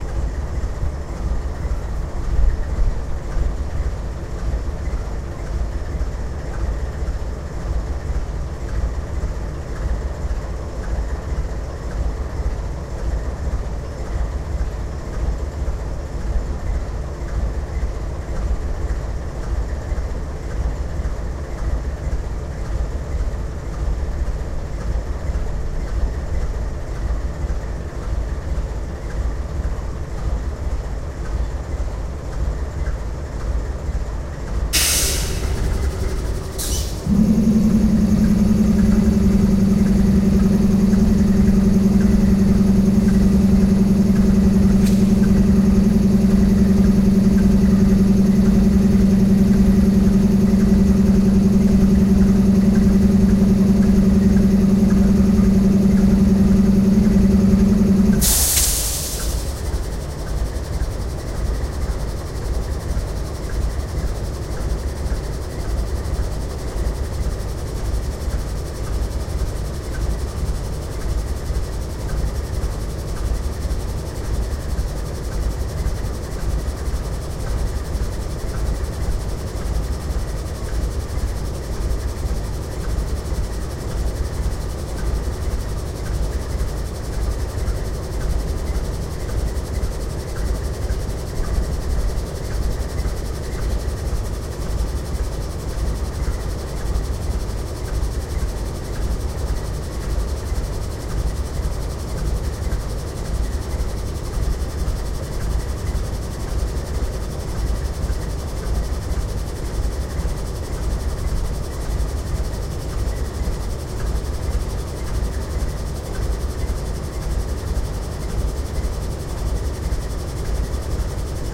Recorded with an H2, no modifications.
A diesel engine idling on the tracks. I heard the many rhythms in the mechanism and just had to capture.
diesel, locomotive, railroad, train